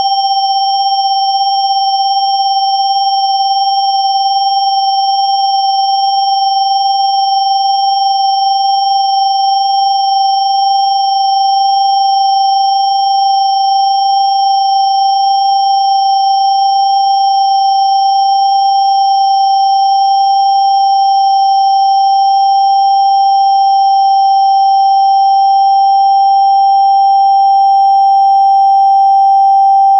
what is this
Long stereo sine wave intended as a bell pad created with Cool Edit. File name indicates pitch/octave.
bell, pad